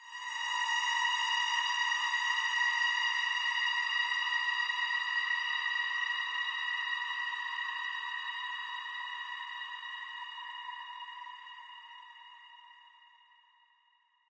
Spooky ambient sound
Just played around with a granulizer and this sound came out of it.
Actually I made a track before where I was looking for this exact sound. But until now, I couldn't find it. So if anyone is looking for the same sound too, here it is.
Scary, Spooky, Ambient